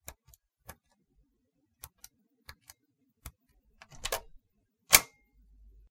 office door keypad
One of those keypad lock security systems attached to an office door. (code 3621Z)